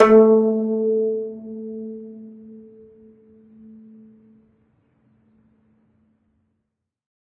single string plucked medium-loud with finger, allowed to decay. this is string 13 of 23, pitch A3 (220 Hz).
guzheng, acoustic, flickr, zither, string, zheng, koto, pluck, kayagum, kayageum